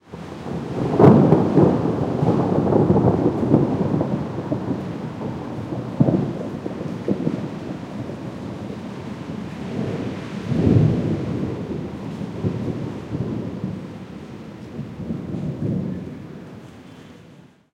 20180422.thunder.rain.43
Thunder clap and rain. Sennheiser MKH60 + MKH30 into SD Mixpre-3, decoded to mid-side stereo with free Voxengo plugin